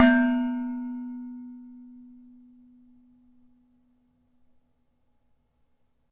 Hitting a large pot lid